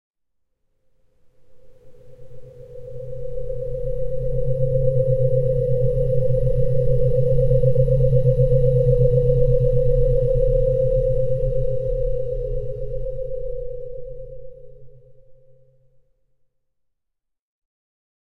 Dark Pad with a slight warble, reminiscent of a UFO.
ambient, dark, edison, fl, flstudio, pad, soundscape, spooky